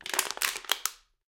COKE CANS CRUSH C617 002
There were about twenty coke cans, four plastic cups, a garbage pail and an empty Culligan water bottle. These were arranged in various configurations and then kicked, dropped, smashed, crushed or otherwise mutilated. The sources were recorded with four Josephson microphones — two C42s and two C617s — directly to Pro Tools through NPNG preamps. Final edits were performed in Cool Edit Pro. The C42s are directional and these recordings have been left 'as is'. However most of the omnidirectional C617 tracks have been slowed down to half speed to give a much bigger sound. Recorded by Zach Greenhorn and Reid Andreae at Pulsworks Audio Arts.
impact, npng